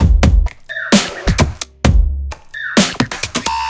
Hiphop/beats made with flstudio12/reaktor/omnisphere2